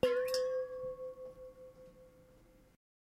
Megabottle - 03 - Audio - Audio 03
Various hits of a stainless steel drinking bottle half filled with water, some clumsier than others.